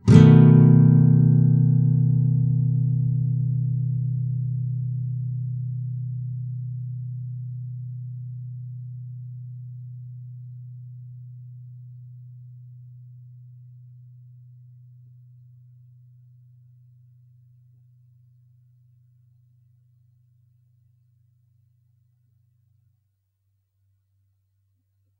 Standard open B 7th chord. 2nd fret 5th string, 1st fret 4th string, 2nd fret 3rd string, open 2nd string, 2nd fret 1st string. Down strum. If any of these samples have any errors or faults, please tell me.
nylon-guitar, open-chords, clean, 7th, chords, guitar, acoustic